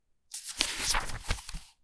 Page Turn - 7
Turning a page of a book